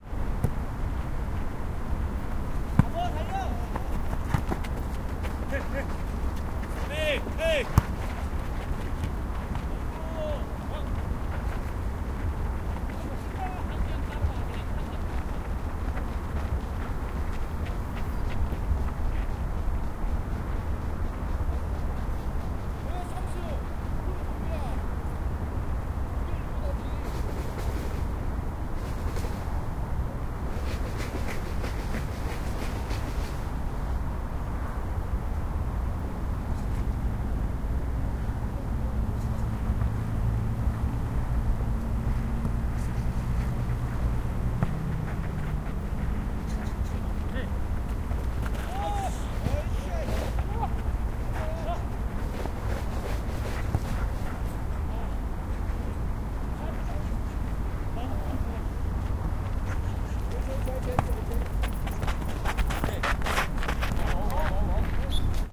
Football match amateur. Traffic in the background. Shoot. Some Birds.
20120129